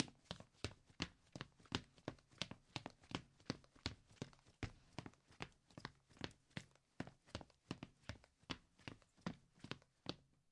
Hi Tops Running on wood
Trying my hand, or should I say feet at foley footsteps.
tired-runner, Running, runner, Wooden-Surface, Foley, Footsteps, Hi-Tops